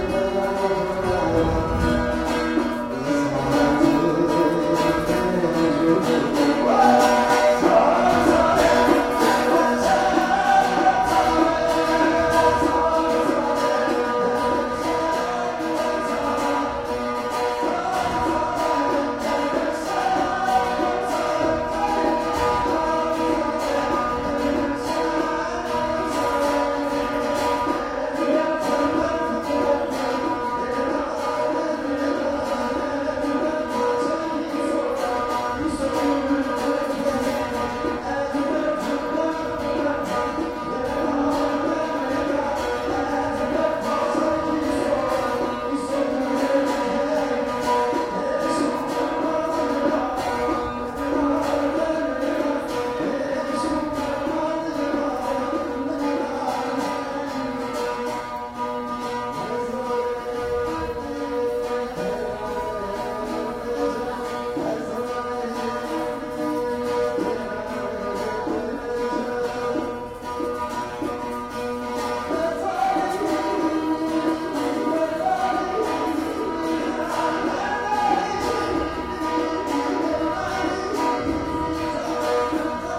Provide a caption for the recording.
Istanbul musician in transition
Record the street musican at the transition near pier
field-recording, streetmusican, city, Istanbul